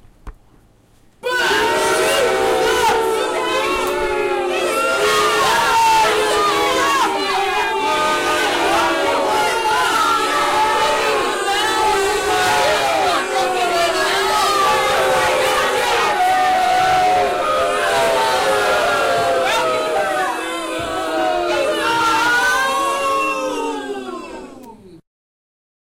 Group of people enthusiastically booing.
angry-audience, angry-mob, boo, booing, boos, mad-crowd, screaming, yelling